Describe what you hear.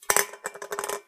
56 recordings of various manipulations of an (empty) can of coke on a wooden floor. Recorded with a 5th-gen iPod touch. Edited with Audacity